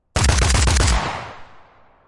Shoot 2 HZA 01-08-2021
shoot gun shooting shot weapon firing military warfare army war rifle attack pistol sniper shooter fire